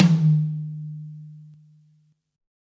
High Tom Of God Wet 012
drum, realistic, tom, high, pack, kit, set, drumset